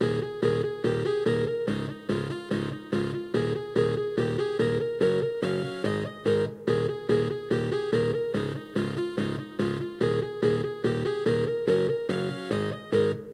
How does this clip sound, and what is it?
A music loop in the settings of a keyboard.
music, loop, electronic